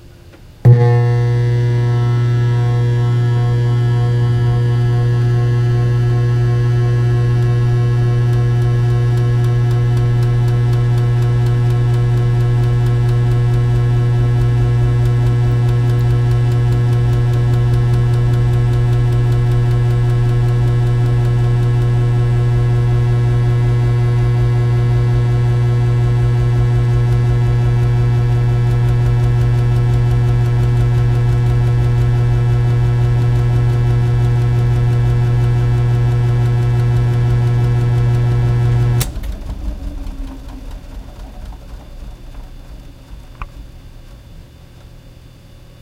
Electric organ with spinning Leslie speaker cabinet turned on while the speaker winds up, then off again. Close miked with a Sony-PCMD50 in an XY pattern.
turn-on, organ, electric, cabinet, rotating, sexy-leslie, speaker, spinning, buzz, wind-up, leslie